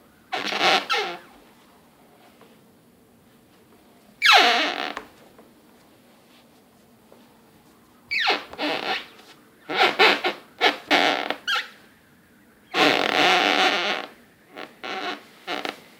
Squeaky floor
Walking over a squeaky spot on a linoleum floor
floor, linoleum, squeak, squeaky